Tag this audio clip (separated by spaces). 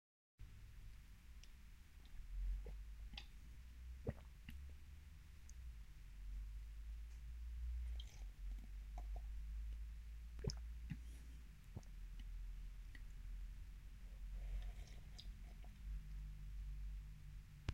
drink drinking noisily sip sipping slurp sup